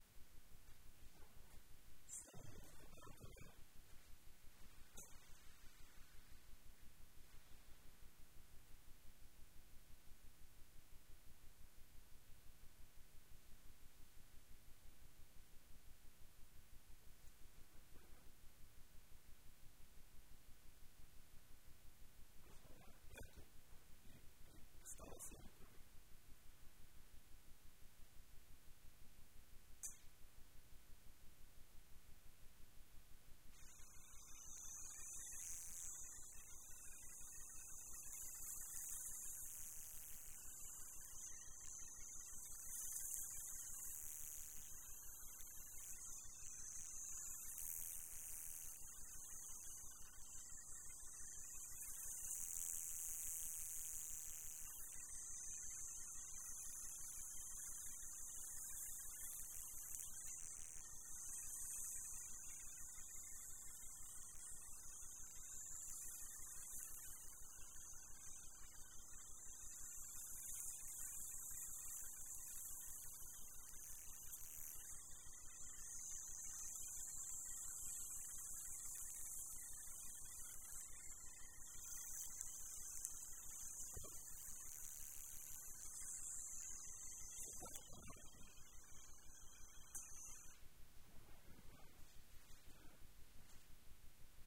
Shaving hair 2
The sound of shaving hair.
H-1 Zoom Recorder
Rode ntg2 microphone
Add some denoiser and you´re good to go.
shaver, shaving, machine, shave, electric, hair